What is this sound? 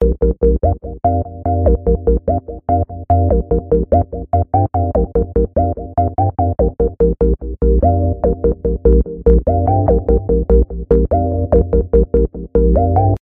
bass loop 146bpm a#
bassline, riff, synth, bass, techno, loop